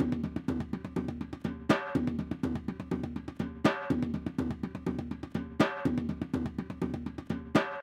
Indian Fill
A cool Indian Percussion fill at 123 BPM.
123, auxillary, beat, bpm, drum, fill, india, indian, kit, percussion, snickerdoodle